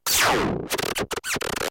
an angry synthesized dog and cat going at it.
TwEak the Mods
leftfield, alesis, small, synth, electro, micron, bass, thumb, beats, idm